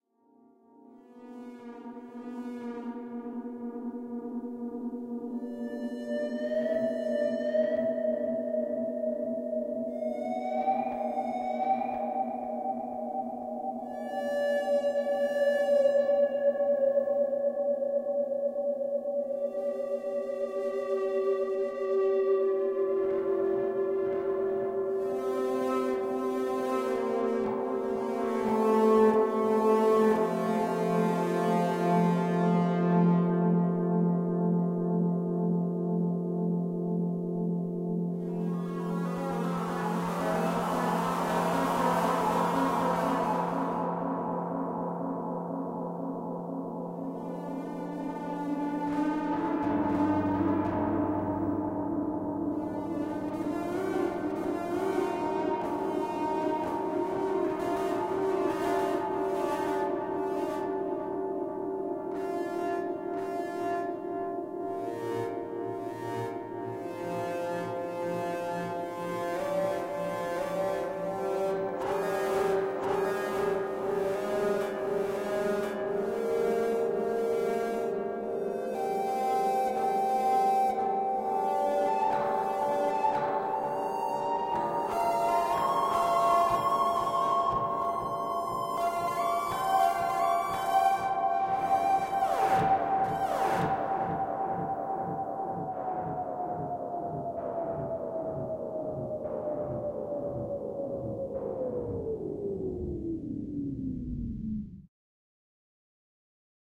Creepy Guitar Echo Chamber
A very creepy and alien sounding track for sci fi and horror projects. Created with guitar playing.
reverb, alien, guitar, fi, wailing, fantasy, dark, crying, ambient, delay, sci, echo, spooky